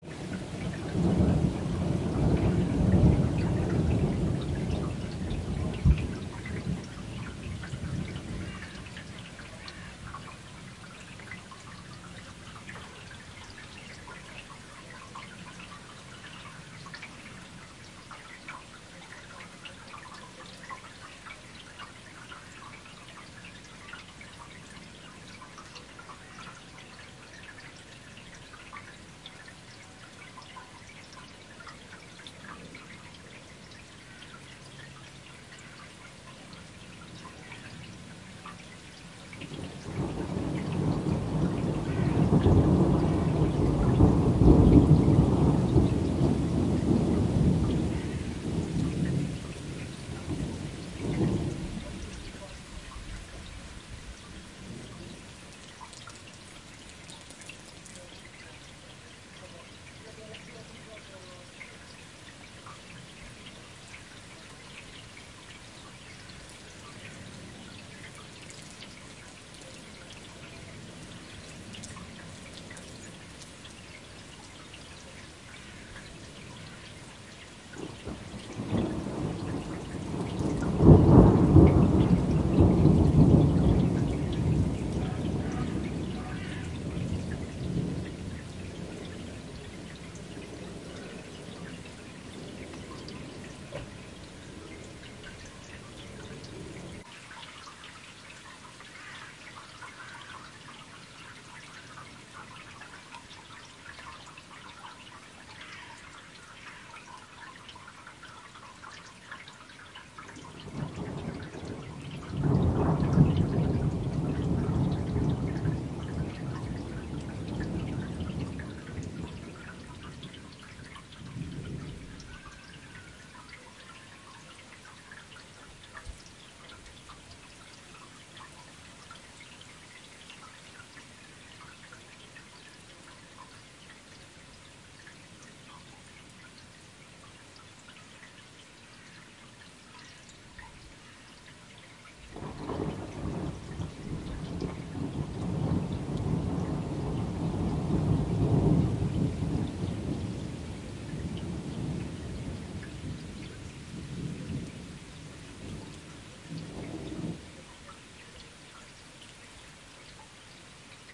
Rain with Thunder and Crow window atmo
atmo, birds, crow, field-recording, germany, nature, rain, summer, thunder, window